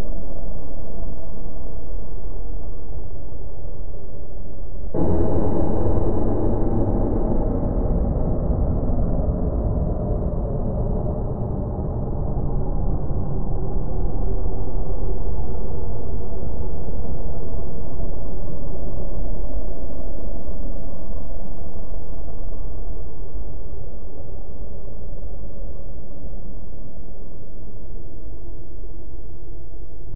creepy spaceship 02
Hard to describe exactly what the noise is, but I picture an alien spaceship blasting off. A variation of creepy_spaceship_01
Found in old recordings. Most likely a granular stretch of a noise recorded in soundbooth. Judging by L/R split was recorded in stereo with a pair of Apex condenser mics.
low-pitch, sci-fi, spaceship, takeoff